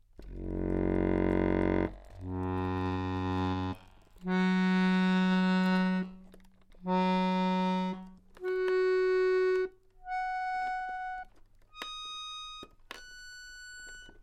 accordion pitches 2
accordion studio recording
pitches, recording